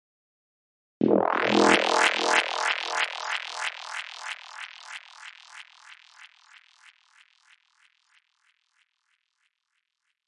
FX-greg 1
ableton live -operator